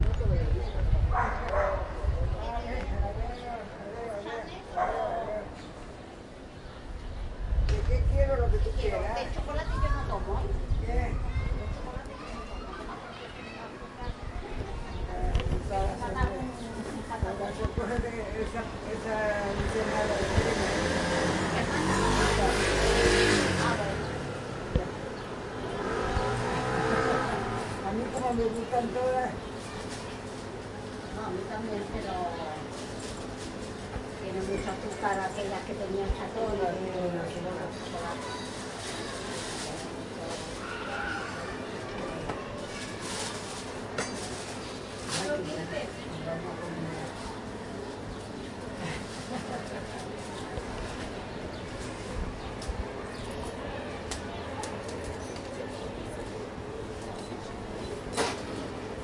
Calidoscopi19 Atrapasons TrinitatVella Forn Trinitat
Urban Ambience Recorded at Forn Trinitat in April 2019 using a Zoom H-1 for Calidoscopi 2019.
Atrapasons, Pleasant, Traffic